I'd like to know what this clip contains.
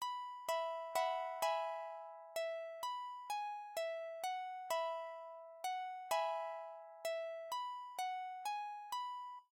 Short Lullaby Song
sample, lullabysong, toy, lullaby, bell